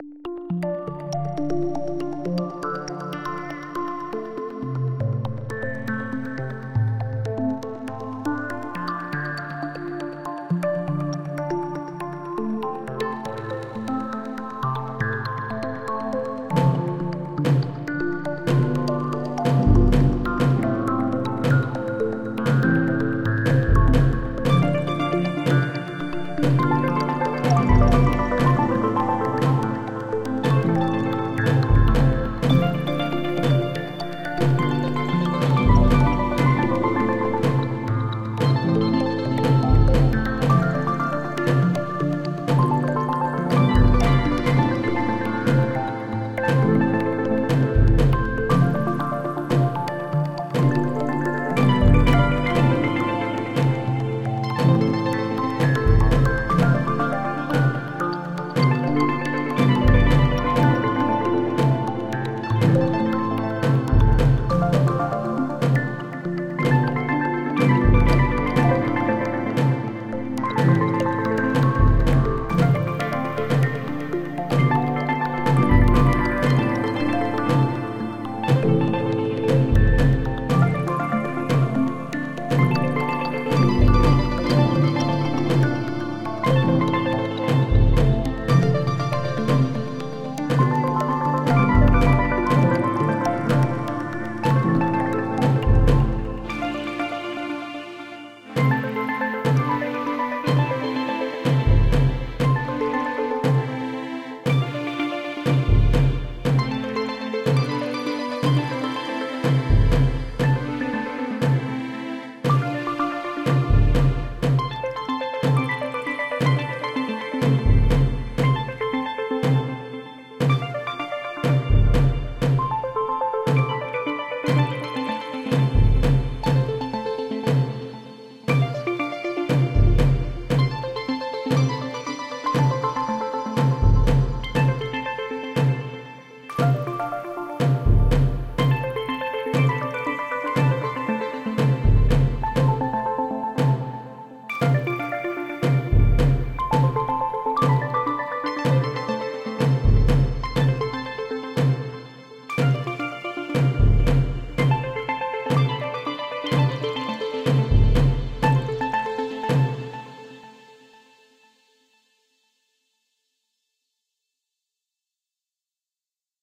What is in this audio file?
Midnight Landing *Unfinished*
120BPM
*The complete version will bring the synths back a little and allow the percussion more presence, while also being full and complete, some sections are noted to change.*
There has been a big gap from my last upload till now, I was hoping to release something more full and complete although got caught up in my studies, So I thought I would release the unfinished version of my Death Stranding inspired Track. Props to LowRoar and Iceland for the initial inspiration.
Kojima, Sci-fi, Ambient, Music, DeathStranding, Space, Orchestra, Icelandic, violin, LowRoar, concert, video-game, Future, Harmonics, Explore, hope, Electronic, apocalypse, DontBeSoSerious, Ambience, Melody, Fiction, beyond, Piano